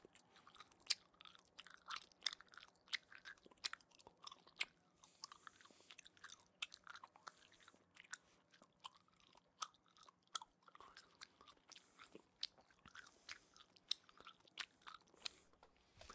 Chewing Gum
Gum, smack